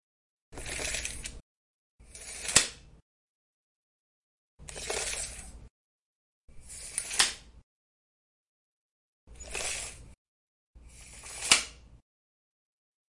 Here is the sound of a tape measure being opened and retracted
clasp, construction, measure, metal, retract, scrape, tape